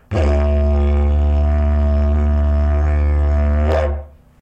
Sounds from a Didgeridoo